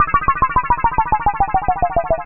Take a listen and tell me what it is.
ambienta-soundtrack moog-sweep pt04-down
used intensively in the final chapter of "Ambienta" soundtrack! i'm proud enough of this sound that I have tried to design and re-produce for along time till obtaining a satisfactory result (i realized the square waveform was the key!!). it's a classic moog sweep you can ear in many many oldschool and contemporary tunes (LCD Soundsystem "Disco Infiltrator"; Luke Vibert "Homewerks"; Beck "Medley of Vultures" ..just to make a few examples). sound was bounced as a long sweep, then sliced as 6 separate perfectly loopable files to fit better mixes of different tempos: first 2 files is pitching up, pt 2 and 3 are pitching down, last 2 files are 2 tails pitching down. Hope you will enjoy and make some good use (if you do, please let me ear ;)
abstract, analog, analogue, cinematic, classic, contemporary, effect, electro, electronic, falling, filters, fx, lead, moog, oldschool, pitch-bend, rise-up, rising, scoring, sound-effect, soundesign, soundtrack, space, square-waveform, sweep, synth, synthesizer, theatre